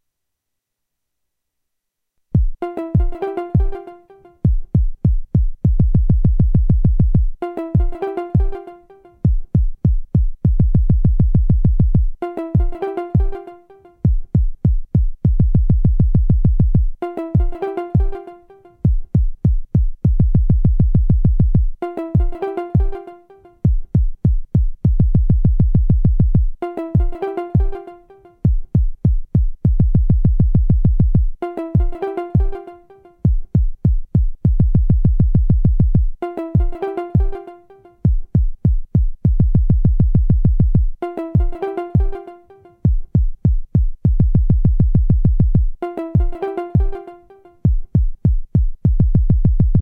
shimmer and stumble
Once again, the name says it all. A little shimmering and a little stumbling. Please enjoy won't you.